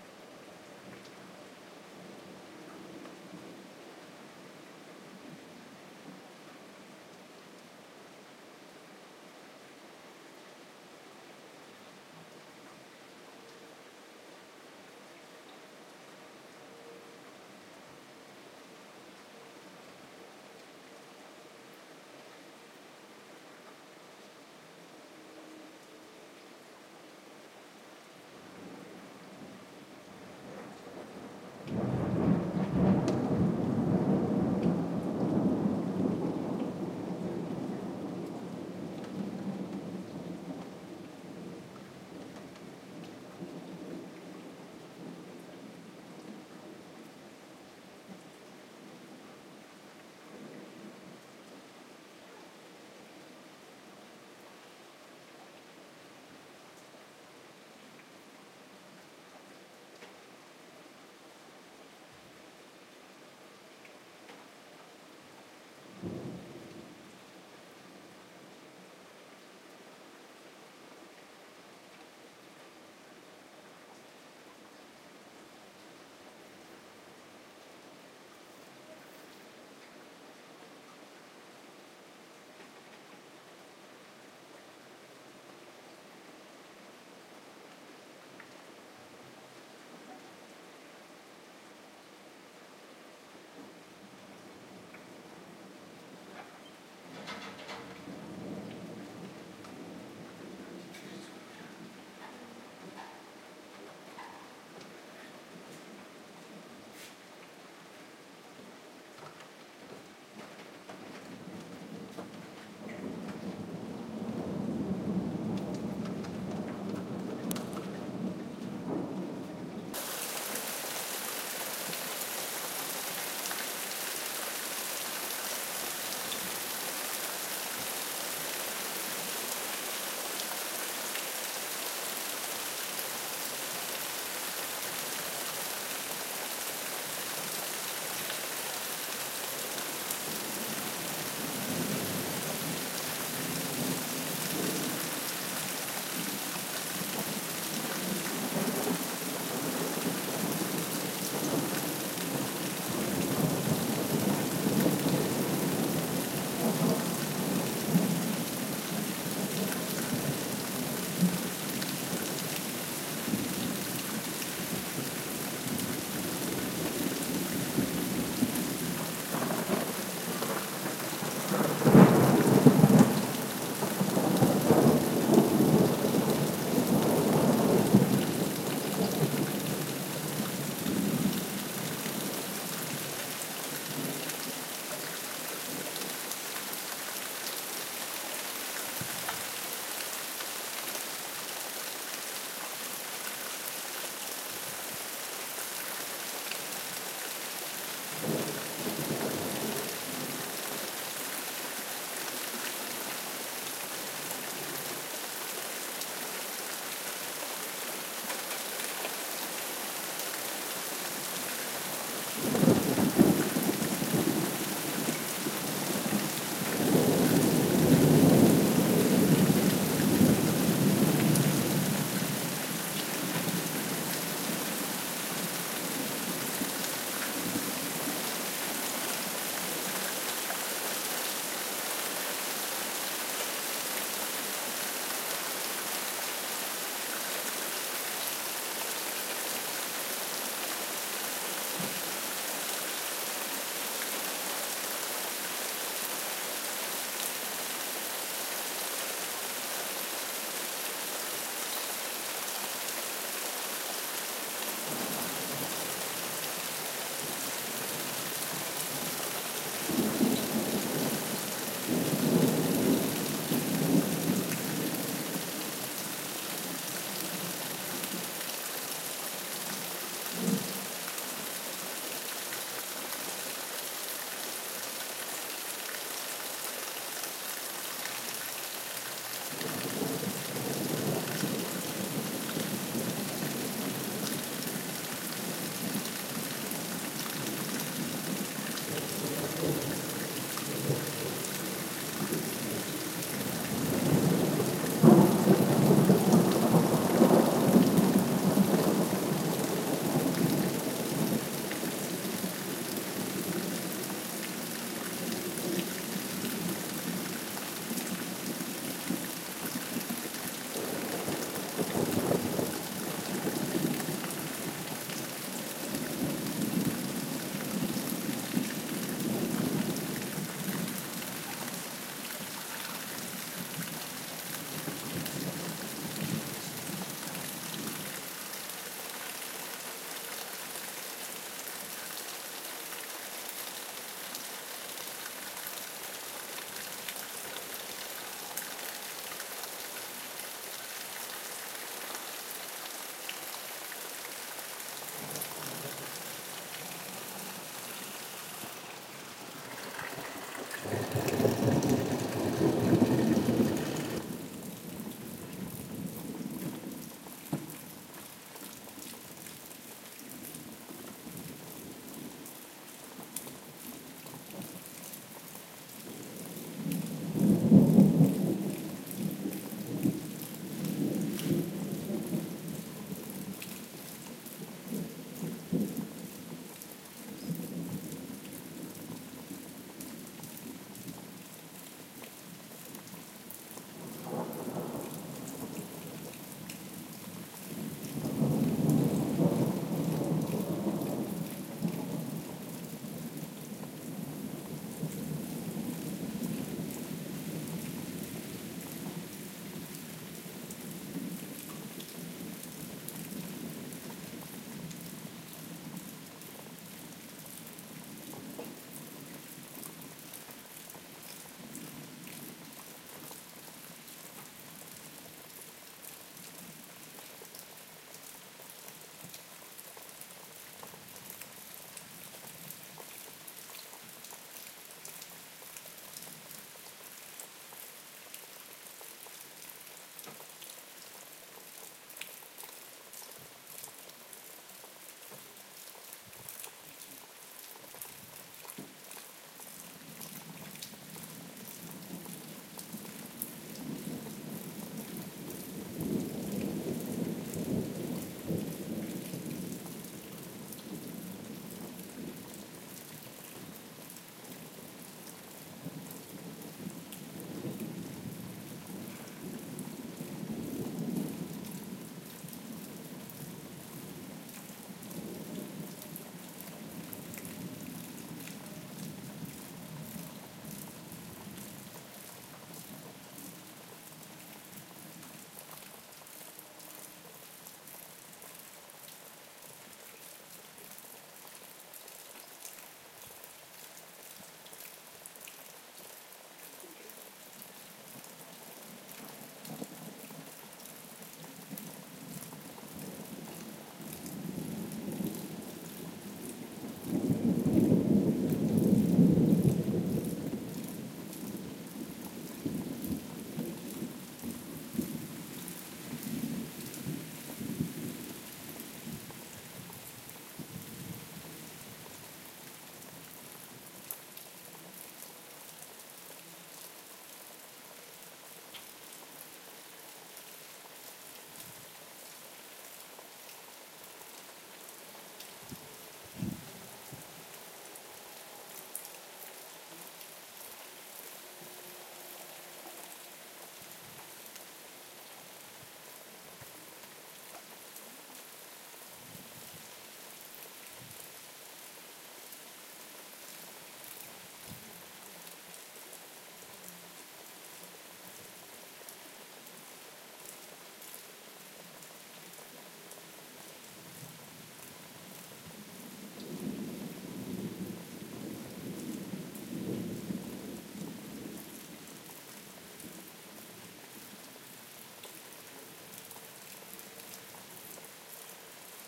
July hard rain, closed and the open the door, 20-Jul-2011
July, rain